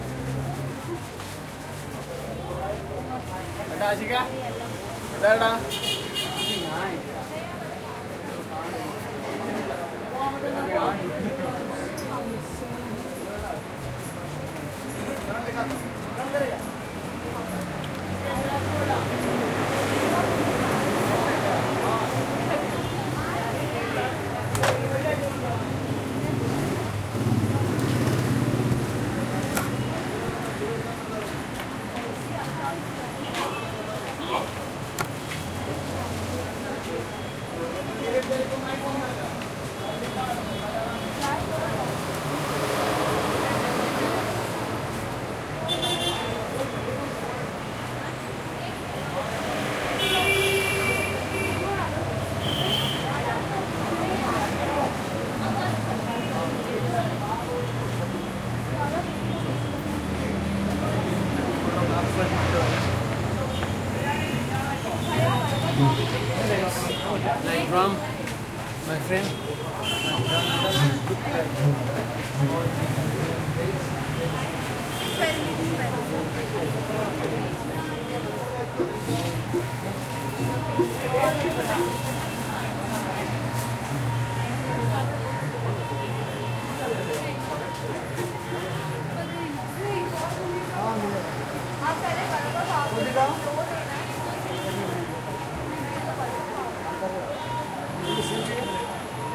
traffic medium throaty nearby covered sidewalk cavernous acoustic street market hall people and movement India
hall, market, movement, sidewalk, India, medium, traffic, cavernous, people, covered, throaty, street, nearby